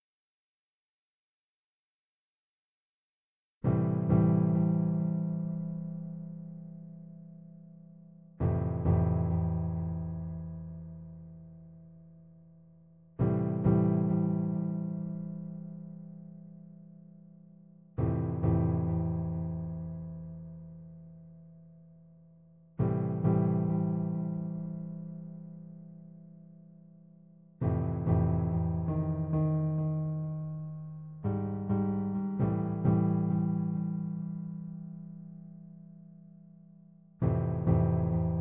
piano - 100bpm - C
An electric piano loop with echo in C. Four bars, but clipped at the end, so you may need to edit. Created in Reason 2.5.
100bpm, echo, electric, electric-piano, piano